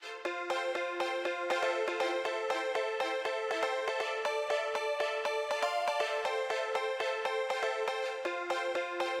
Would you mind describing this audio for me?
keys played from ableton preset-keys-branches with some sounds around.